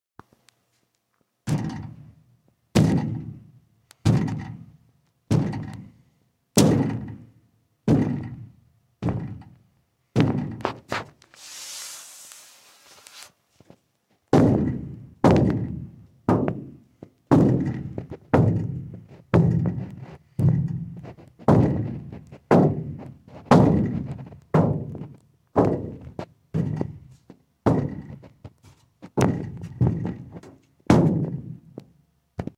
Vent Crawling
A simulation of the noise someone might make crawling through a vent.
-ME Studios
Action, Clang, Crawl, Metal, Steps, Vent